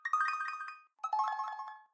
Bonus, power up - ukulele sound
This is a modified sound recorded from my ukulele. I was recording with the A2 litle phone.
The file contains two versions of this audio - you have to separate them and choose the one you like.
This sound is great for games.
bonus, digital, fantasy, game, powerup, science-fiction, sfx